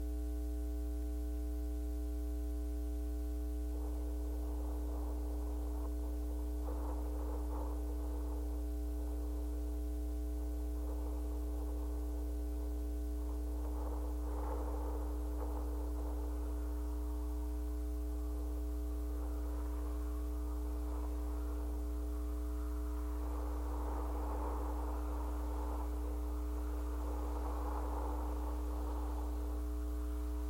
SC Agnews 08 water valve
Contact mic recording of a water valve (backflow preventer) on Lafayette Street in Santa Clara, California, in the Agnews district by the old sanitarium. Recorded July 29, 2012 using a Sony PCM-D50 recorder with a wired Schertler DYN-E-SET contact mic. Low resonance, 60 Hz hum.
contact-microphone, Schertler, power-hum, Sony, DYN-E-SET, mic, PCM-D50, wikiGong, water-valve, contact, mains, field-recording, contact-mic